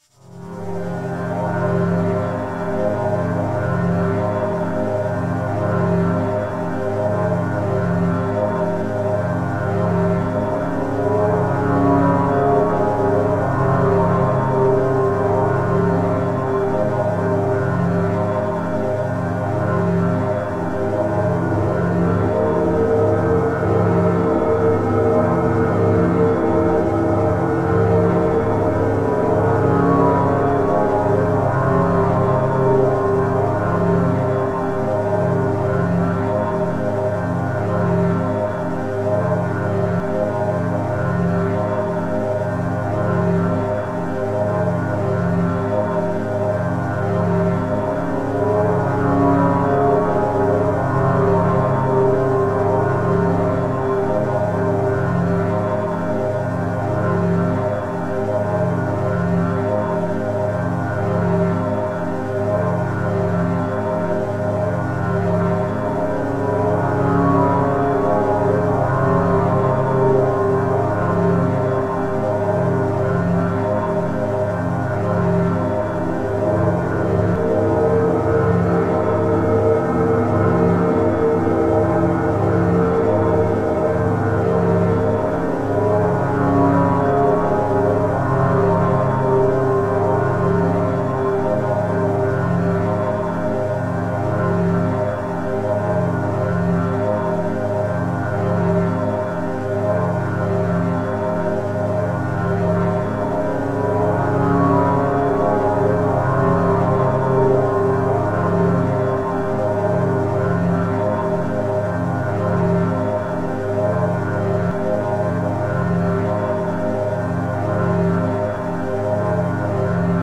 11102013 space gaming
I made this track out of the following tracks for a friend of mine's gaming project. He wanted something simple and small for a game that is set in space.
-Rama
Sound-Track, Game, Space